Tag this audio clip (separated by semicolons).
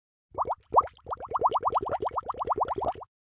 boiling; bubble; bubbles; bubbling; bubbly; liquid; potion; underwater; water